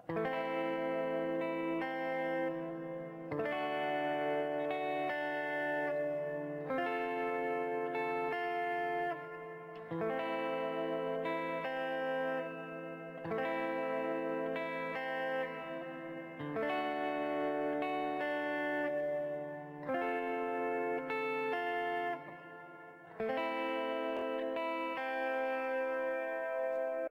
Ambient Lo-Fi guitar chords
Some ambient guitar chords.
Recorded with a PRS SE 245 guitar and Peavey Vypyr 15 amp on to an ipod touch.
Chords are:
Am
D
A D shape chord at the 5th fret.
Enjoy!
vintage, PRS, guitar, ambient, soft, calm, crackly, chords